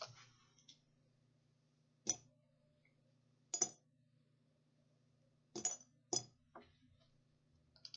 Dropping paperclips in glass container, what else to say?